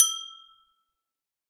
Wine Glass Hit E6
Wine glass, tuned with water, being hit with an improvised percussion stick made from chopstick and a piece of plastic. Recorded with Olympus LS-10 (no zoom) in a small reverberating bathroom, edited in Audacity. The whole pack intended to be used as a virtual instrument.
Note E6 (Root note C5, 440Hz).
clean, glass, hit, instrument, melodic, note, one-shot, percs, percussion, percussive, tuned, water, wine-glass